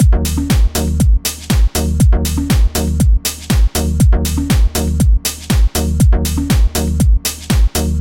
House drum loop 001
House Samples / Drum loop / Made using Audacity y FL Studio 11
120bpm, drumloop, House